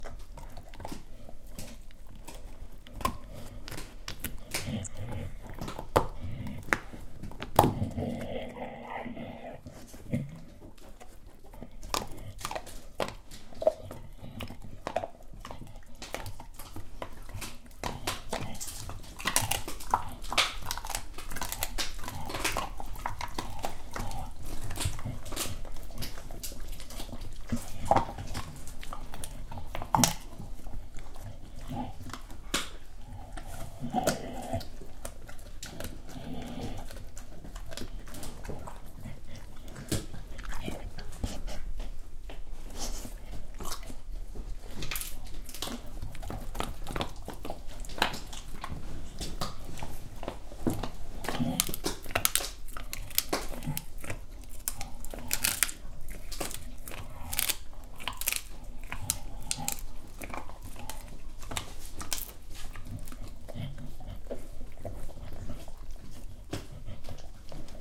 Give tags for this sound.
pet; eating; crunching; Horror; Growl; Fantasy; chew; disgusting; crunch; Animal; chewing; Dog; pig; bones; munching; Monster; bone-crunching; Scary; Snarl; Creature; dog-chew; mouth; food; Astbury; Big; pets; sound-effect

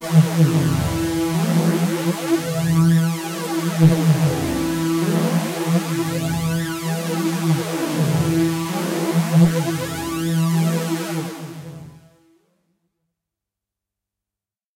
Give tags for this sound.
waldorf; electronic; lead

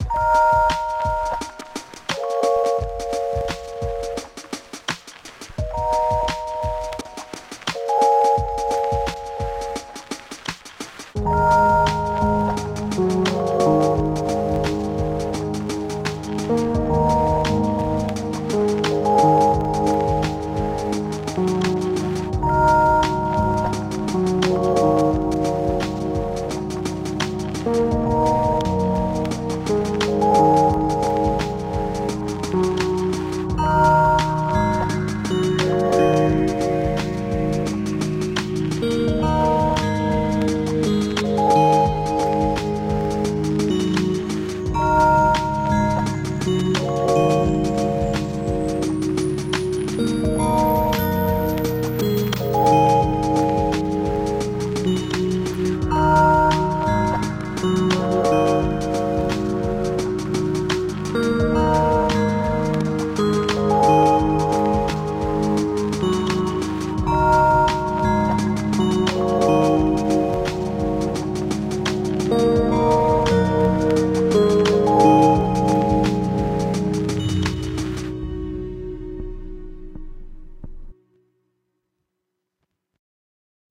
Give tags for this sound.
chill; dark; downtempo; drum; electro; evolving; groove; hip; house; korg; live; loop; oregon; percussive; portland; synth

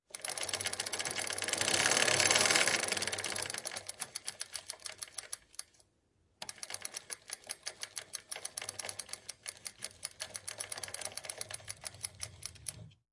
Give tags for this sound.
bicycle; bike; clicking; cycle; gear; high; mechanic; mechanism; metallic; turning; wheel

